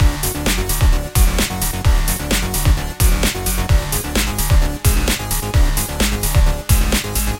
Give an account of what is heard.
Keep the bass drilling with this slightly classic inspired loop. It's rhythm may not refer to anything from the 80s but the bass synth used for this segment does. In a somewhat modern way I guess.
Made using FL Studio